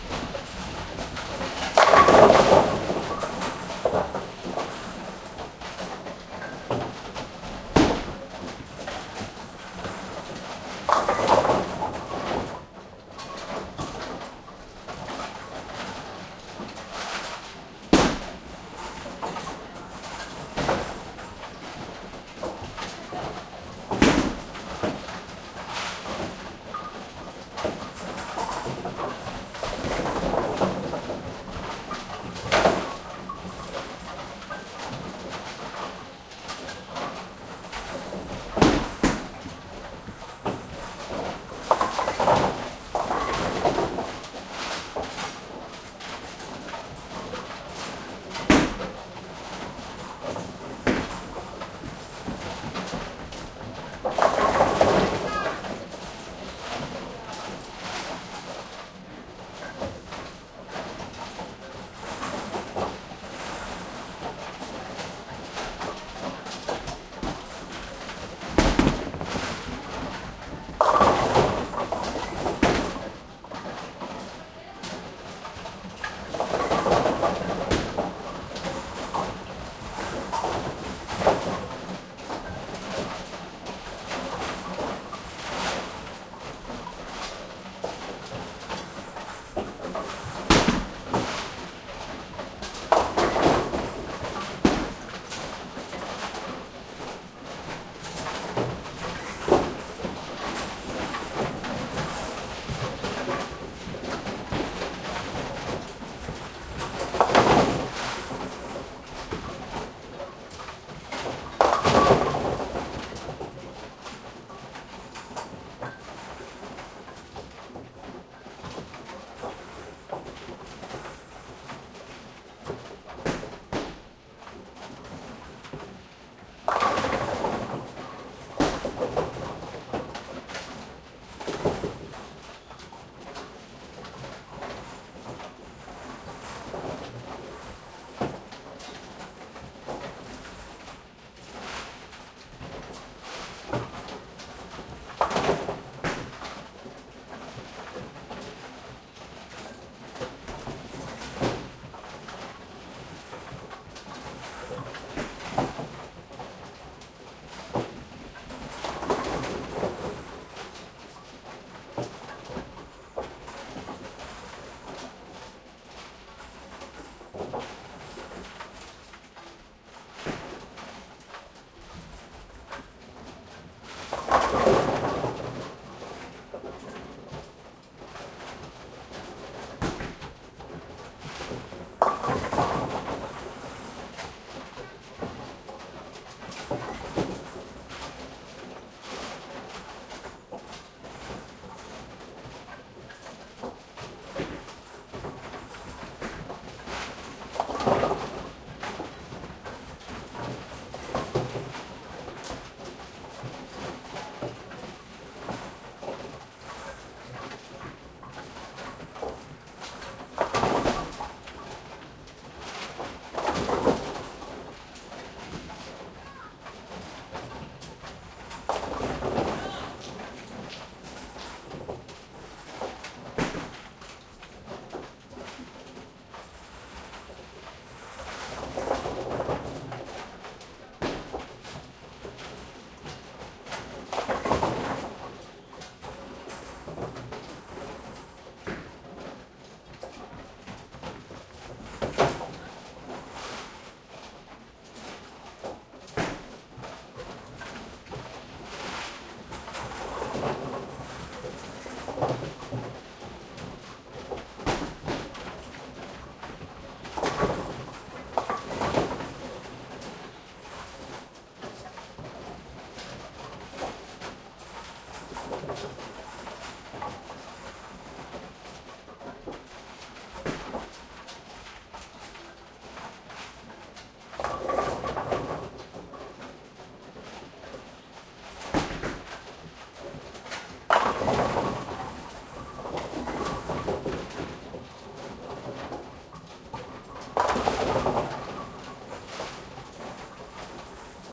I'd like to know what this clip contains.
Detailed sound in the end of bowling lanes.
bowling, bowling-lanes, bowling-machine, pub